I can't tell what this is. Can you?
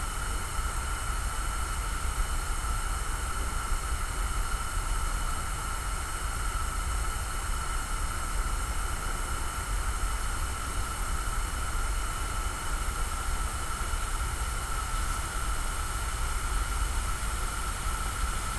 Gas Oven
Sound burning gas on the oven.